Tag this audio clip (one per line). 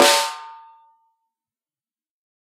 1-shot
velocity
multisample
drum
snare